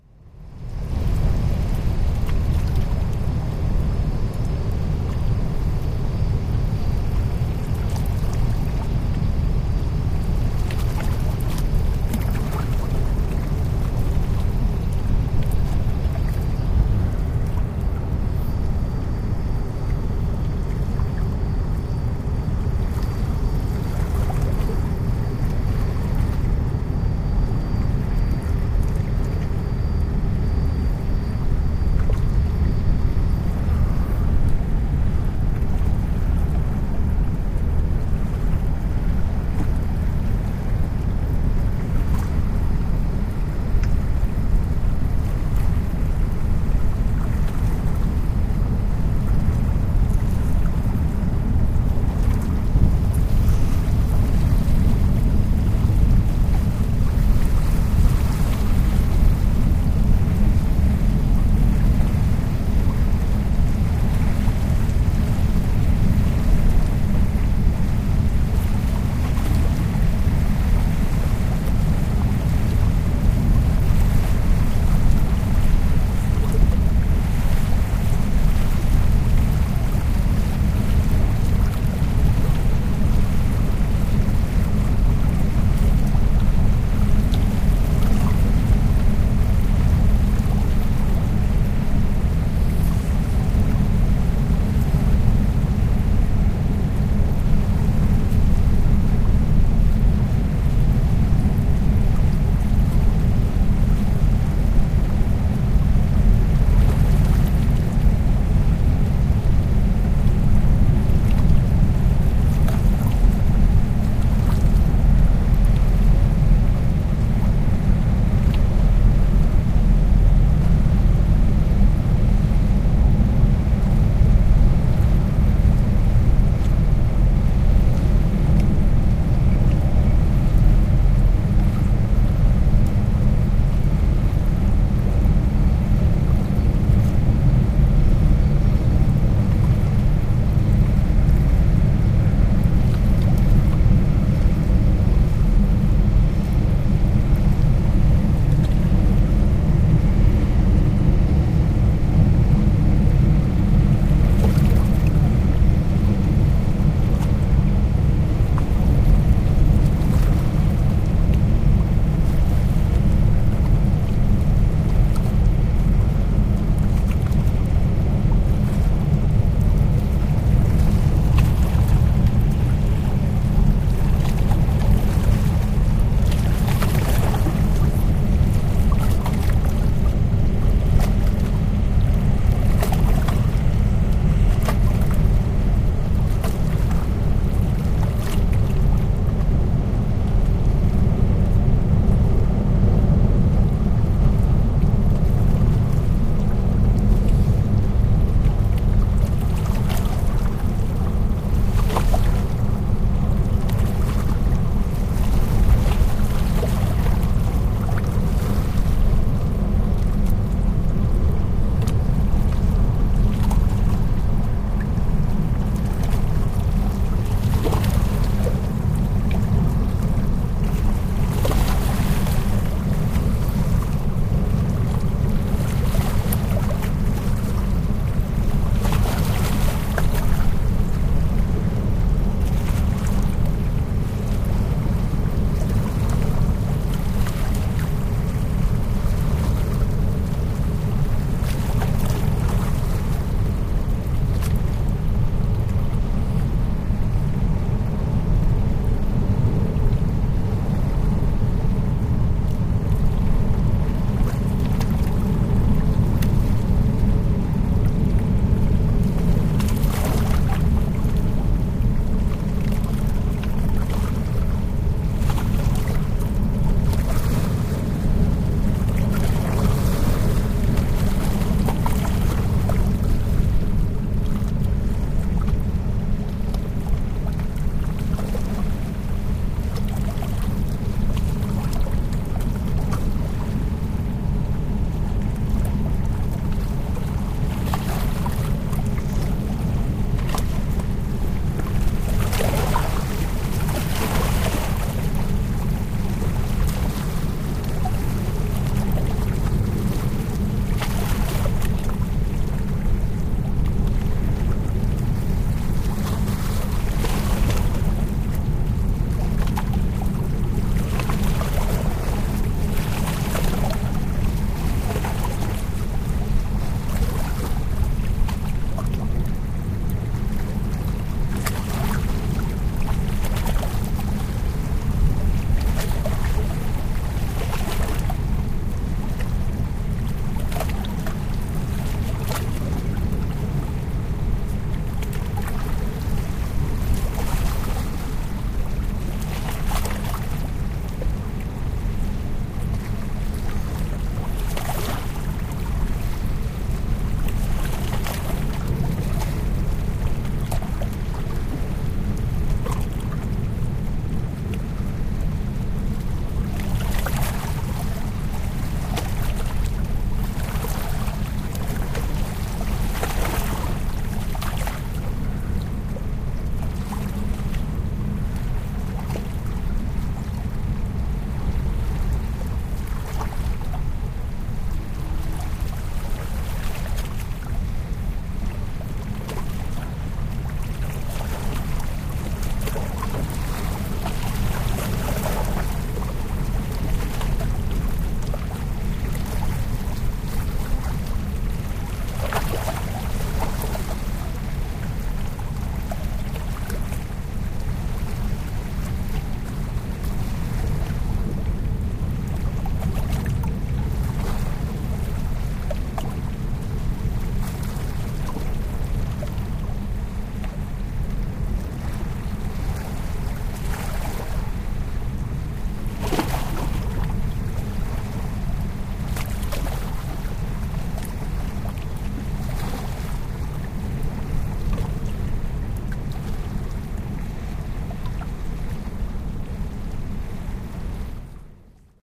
eighty containers 2
About 80 sea containers moving upstream on a ship towards Germany. I'm sitting on the riverbank with a recording Edirol R-09.